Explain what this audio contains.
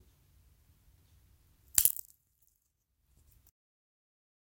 I'm a student studying sound and I and recording sounds this is one of the recordings.
This sound is a dry stick broken in half by hand.